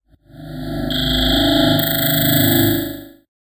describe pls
Short and weird sound from a haunted room.
horror, scary, eerie, spooky, Haunted, weird